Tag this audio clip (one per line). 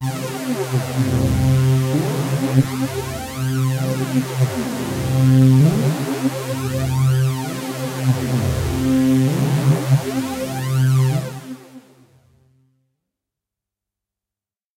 electronic
hard
lead
multi-sample
phaser
synth
waldorf